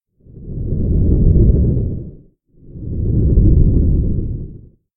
Something very large breathing.